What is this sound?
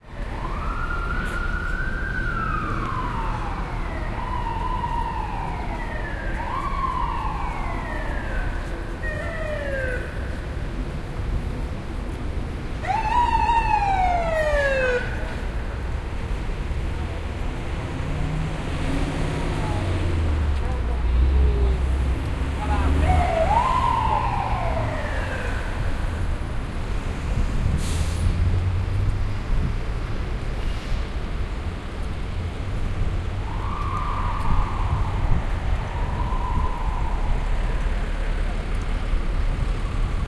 OKM Binaural recording in New York